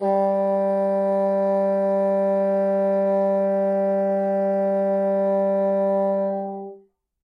One-shot from Versilian Studios Chamber Orchestra 2: Community Edition sampling project.
Instrument family: Woodwinds
Instrument: Bassoon
Articulation: sustain
Note: F#3
Midi note: 55
Midi velocity (center): 95
Microphone: 2x Rode NT1-A
Performer: P. Sauter
sustain, vsco-2, midi-note-55, multisample, single-note